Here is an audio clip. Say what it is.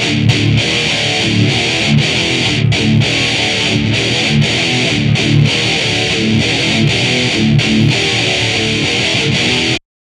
REV LOOPS METAL GUITAR 9
rythum guitar loops heave groove loops